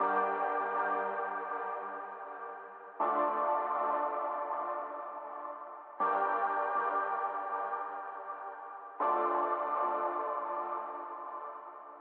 Hard Pad Chord Stabs - 160bpm - Gmin - New Nation
atmosphere, rnb, middle-east, pad, smooth, japanese, trap, chinese, hip-hop, loop, eastern